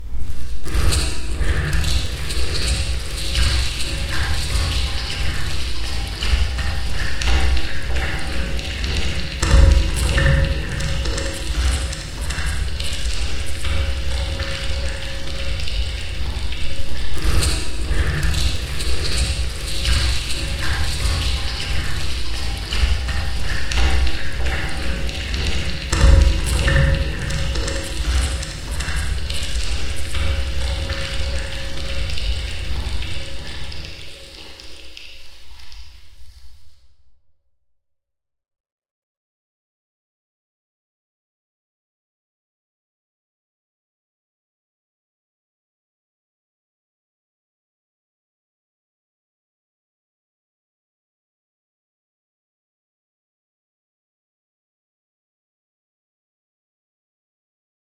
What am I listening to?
In the Slimy Belly of the Machine
Using Logic, I manipulated the sound of a Chobani Flip being stirred into the ambient sound of a cavernous, slimy factory. You can hear echoing footsteps of somebody running, the mechanical thuds of machinery, and the wet sounds of slime. Original recorded with a Tascam DR-40.
factory, footstep, slime, squash, wet